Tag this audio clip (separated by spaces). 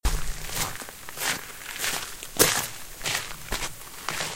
loud-footsteps Dirt-footsteps footsteps crunching gravel-footsteps walking